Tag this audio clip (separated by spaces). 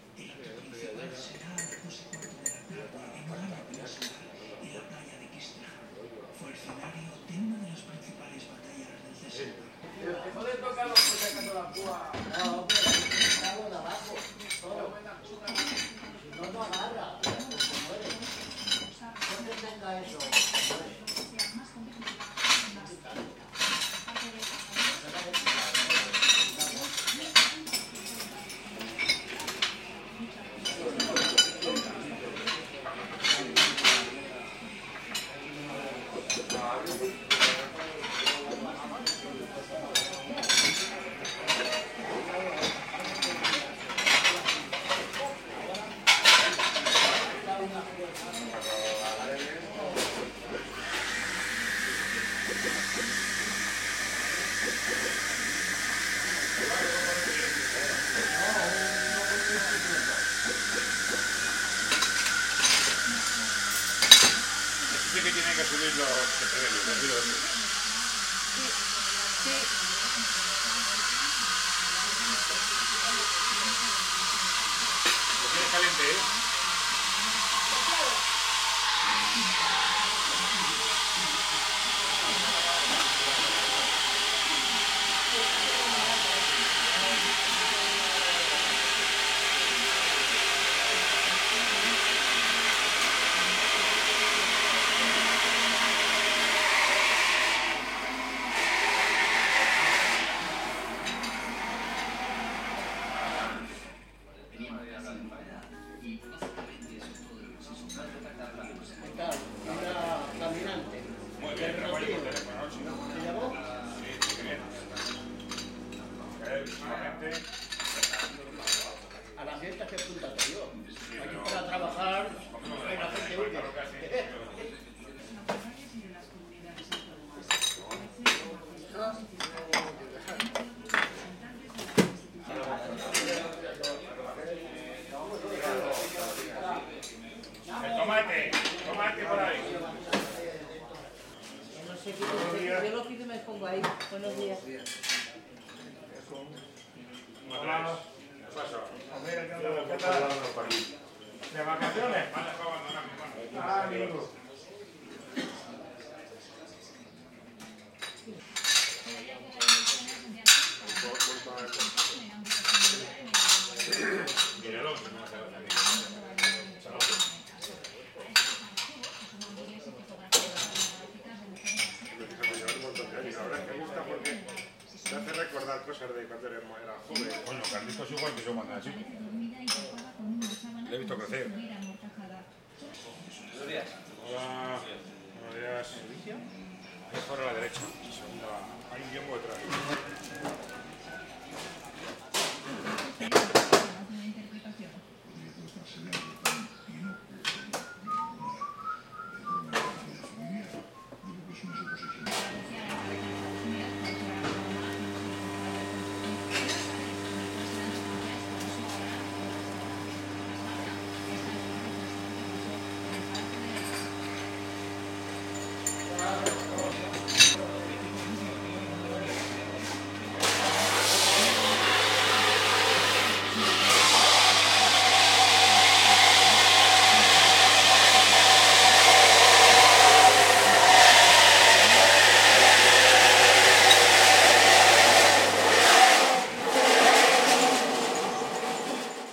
bar cafe coffee-shop espresso-machine La-Adrada public-chatter Spain tableware urbano vajilla